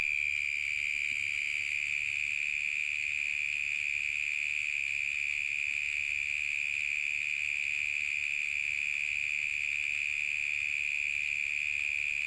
Outdoor recording of cicada in Australia
outdoors, australia, cicada, farm